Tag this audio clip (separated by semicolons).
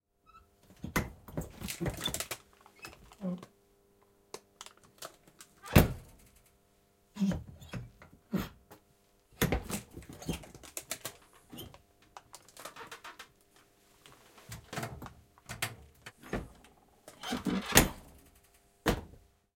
close; door; fridge; kitchen; old; open